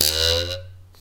cartoon jump2
cartoon style jump sound
foley, jump